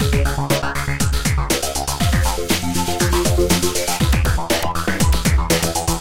Thank you, enjoy
beats, drum-loop, drums